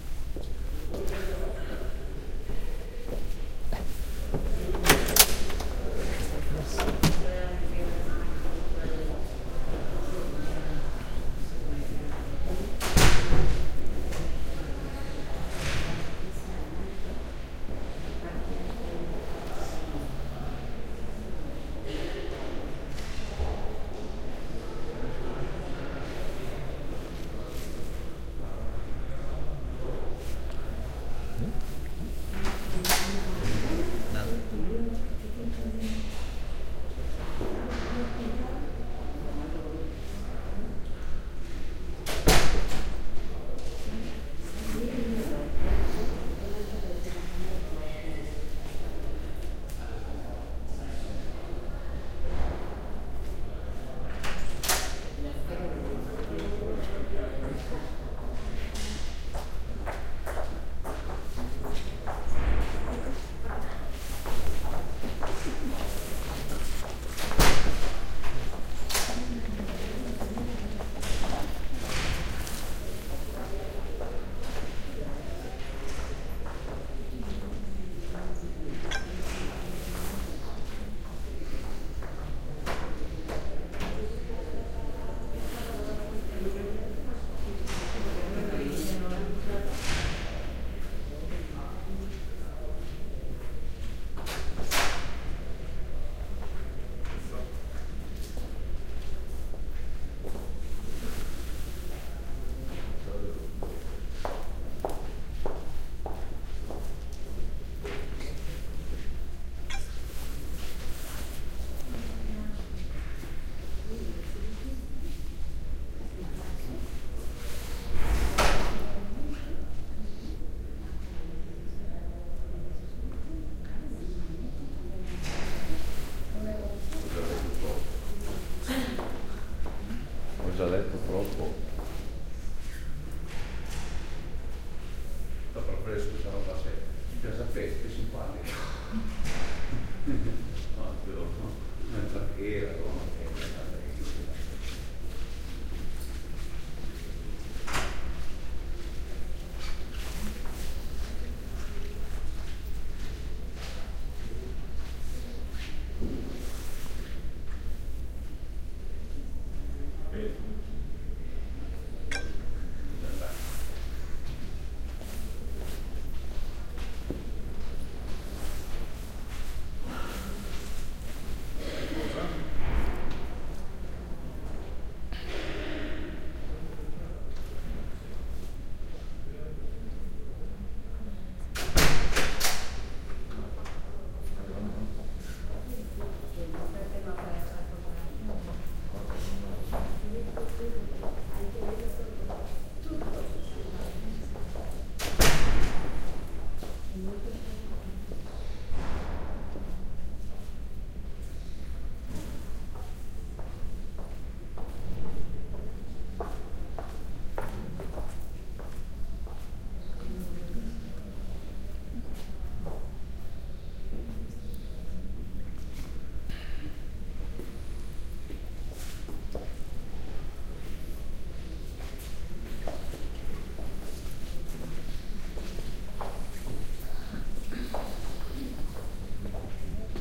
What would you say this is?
door opening and closing in a reverberant hall, with soft talk and voices. Recorded at the entrance of the Picasso Museum (Malaga, S Spain) during the exhibition entitled 'El Factor Grotesco'. OKM mics into PCM-M10 recorder